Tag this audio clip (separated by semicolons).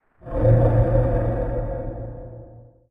dark; evil; fear; ghostly; Halloween; sinister; spooky; thriller; uncanny